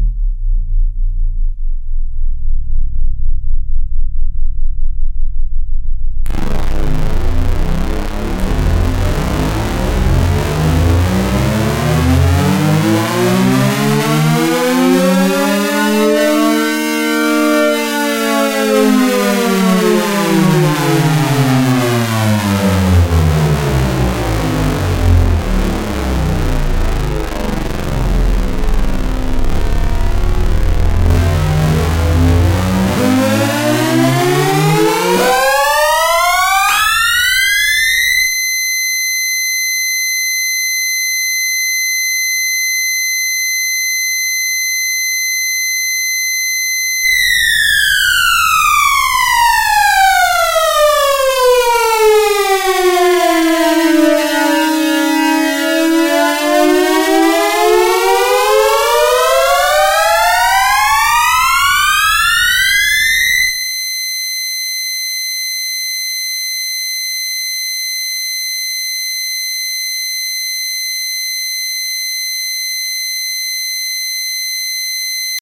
TASSMAN SOUND 1
sci-fi, laboratory, robot, alarm, experimental, drone, alien, annoying, siren, signal, sweep, ambeint, sound-design, extreme, electronic, modulation, blast, damage, experiment, laser, electric, computer, digital, random, space-war, space